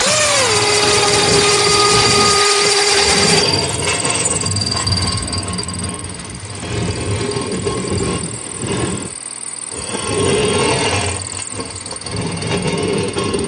Mechanical sfx M004
running, motor, factory, hum, metal, sounds, machinery, machine, saw, engine, sfx, buzzing, buzz, drill, power, operation, industrial, mechanical, generator, run, loud, mill